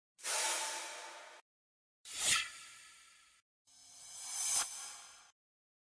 Nice Swoosh
no credit or mention needed. Great for movement or transitions!